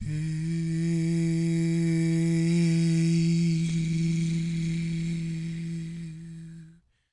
Word Hey recorded in singing inspired by Joan La Barbara
I would just like to get note how it works for you and hear it of course.But it is up to you.
vocal, tone, hey, sample